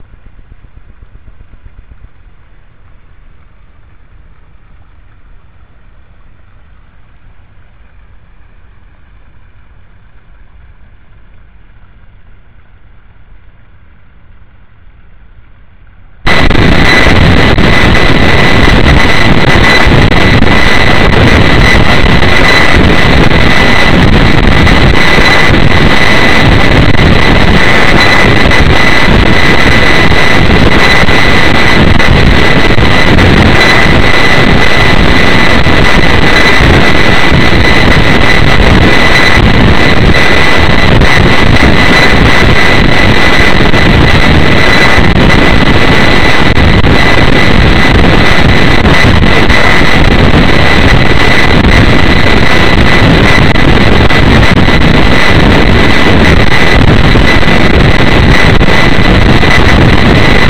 The sound of a small pond along with other sounds located in Gibraltar's Alameda Botanic Gardens.